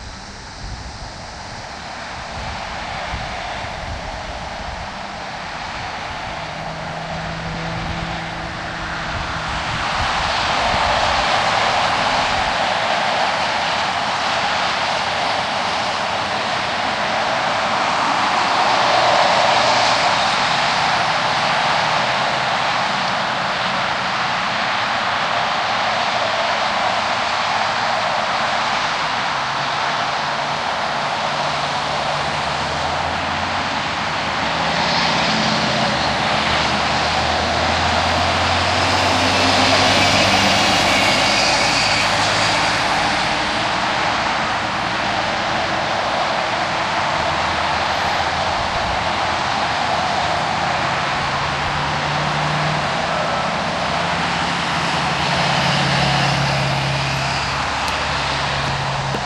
Some files were normalized and some have bass frequencies rolled off due to abnormal wind noise.